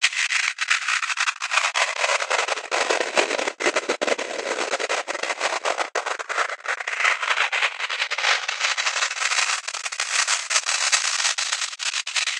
Synthetic friction
Noise synthesized into some kind of friction/stretching sound
noise, idm, granular, electronic, stretching, grains, glitch, flanger